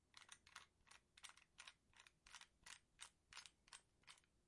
Spinning revolver cylinder 1
Quietly spinning through the chambers of a revolver. recorded with a Roland R-05